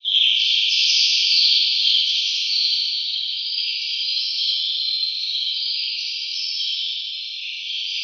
birds singing in garden; one bird with sfx Hall
birds, birdsong, nature, spring